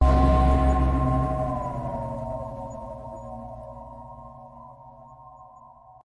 A soft, haunting chord with a metal hit